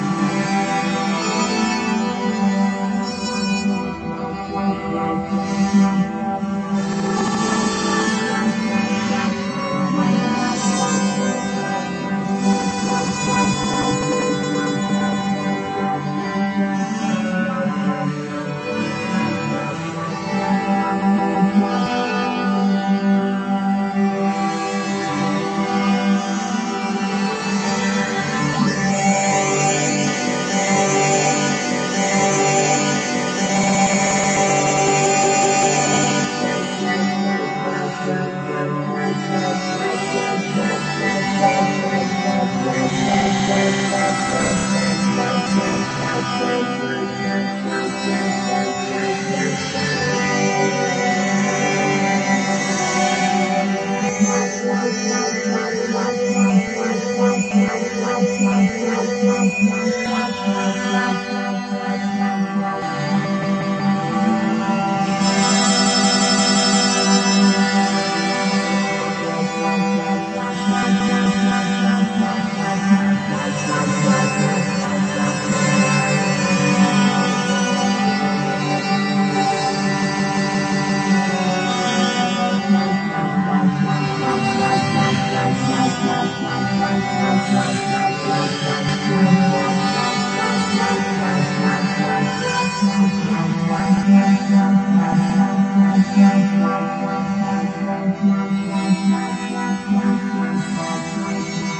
Ambient Acoustic Loop A
Ibenez acoustic guitar recorded using Audacity and native mics on my Acer Laptop. Streched using Audacitys Paul Stretch.